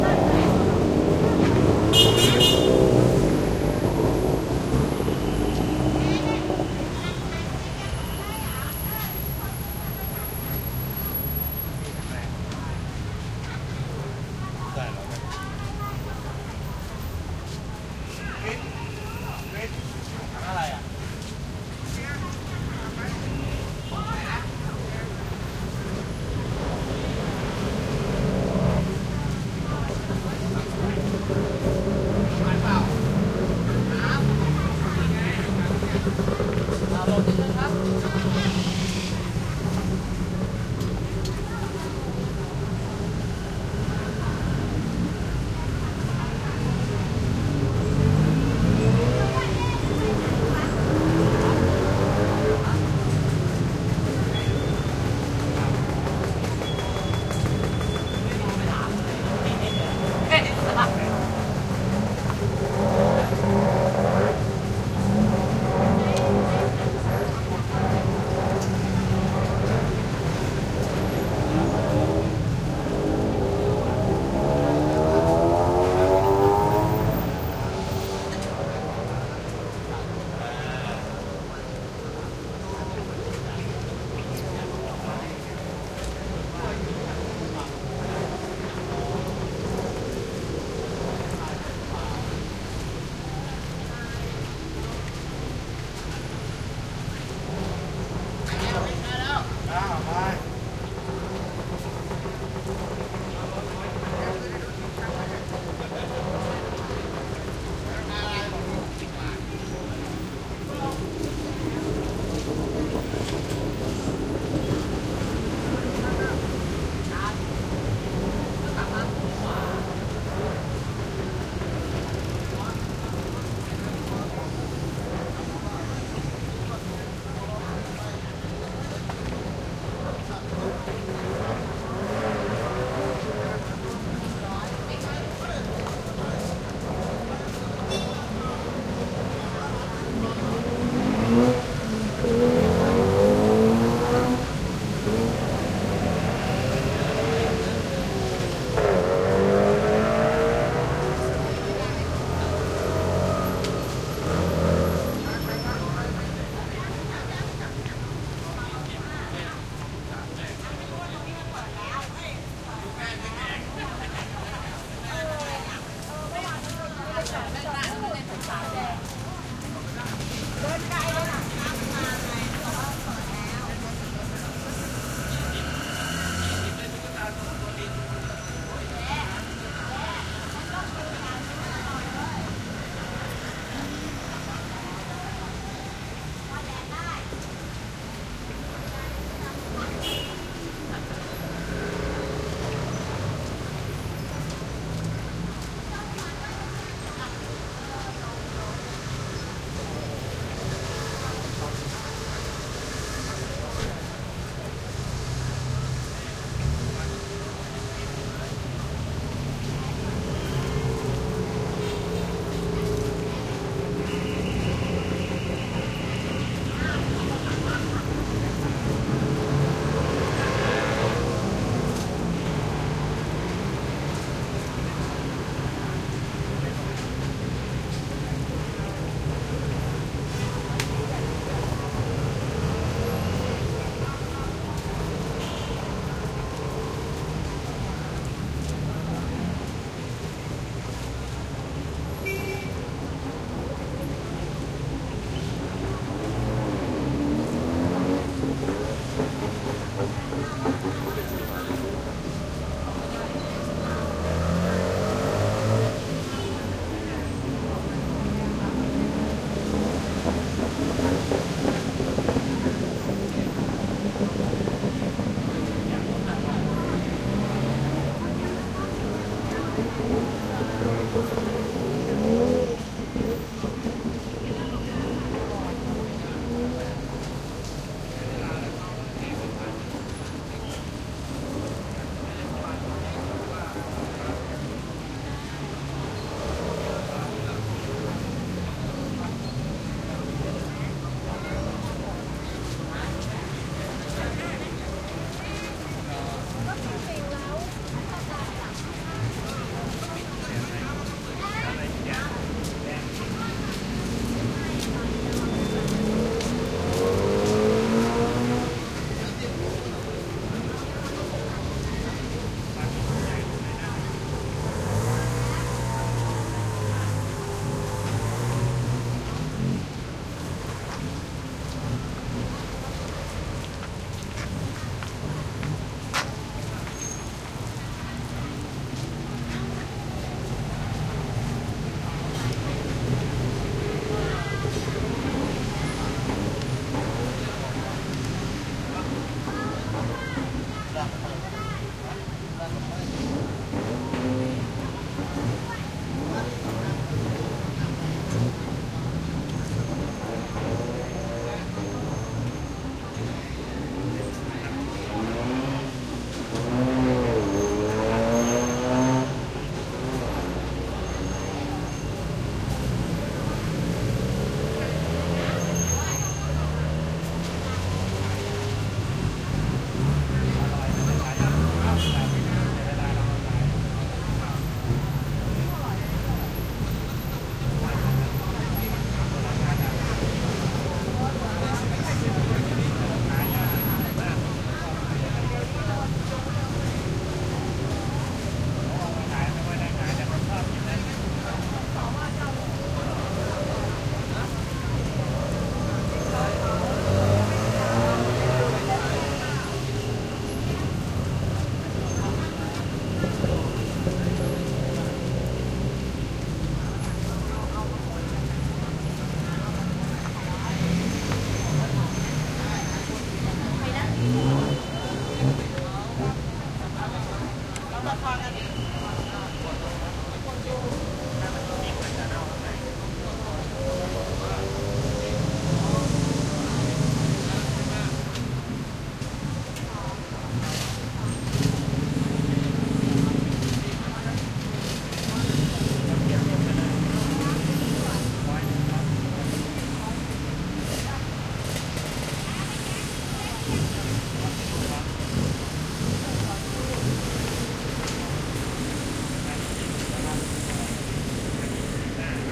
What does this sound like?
Thailand Bangkok street light traffic motorcycles pedestrians